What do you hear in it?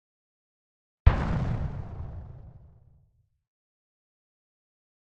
Synthesized Explosion 05
Synthesized using a Korg microKorg
explosion, grenade, synthesis